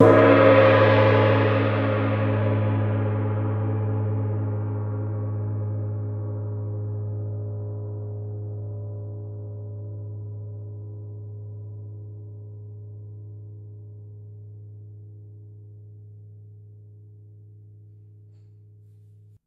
A loud gong strike sample
Gong-strike, Loud, Sample